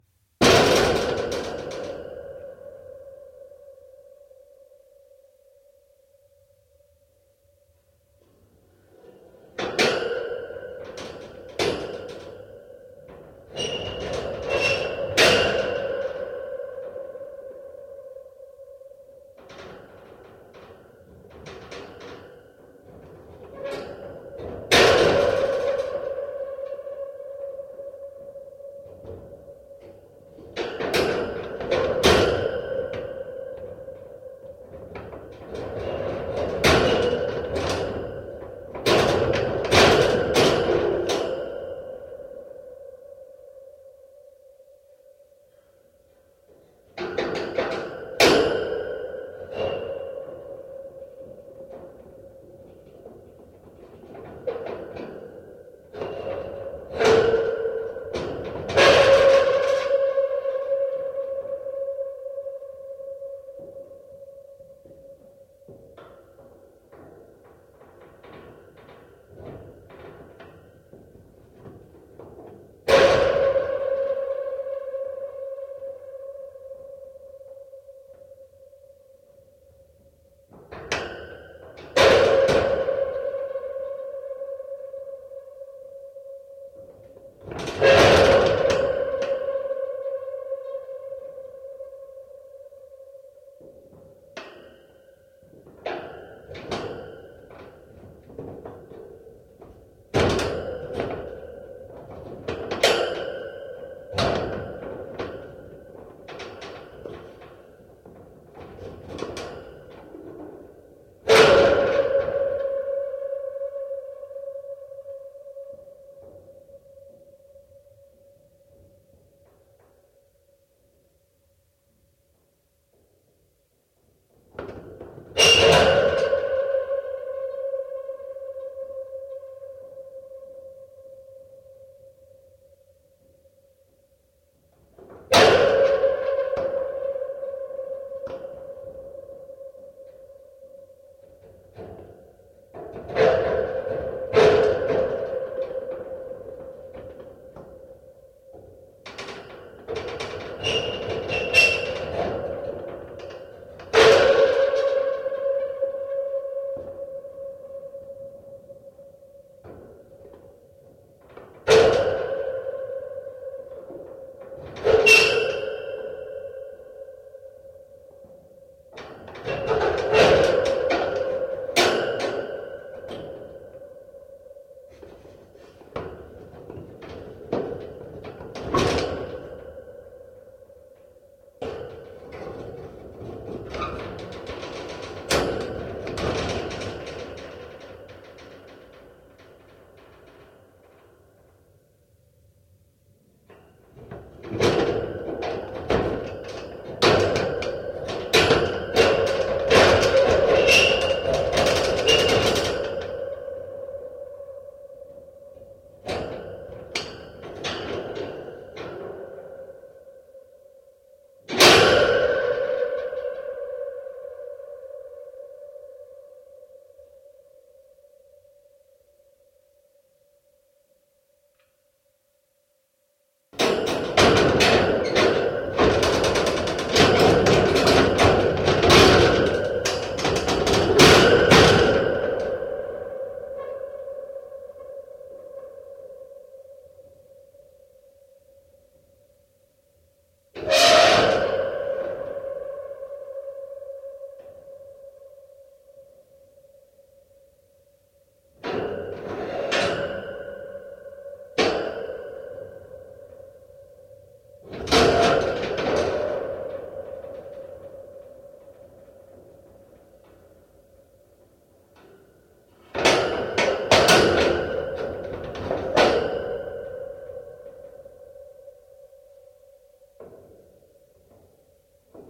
contact metal cage opening and closing locks horror sounds mono
This sound effect was recorded with high quality sound equipment and comes from a sound library called Metal Contact which is pack of 136 high quality audio files with a total length of 230 minutes. In this library you'll find different metal sound effects recorded with contact microphone.
clank, effect, closing, gear, tool, horror, opening, close, tools, contact, microphone, sound, mechanical, open, reverb, shake, metal, shaking, impact, metallic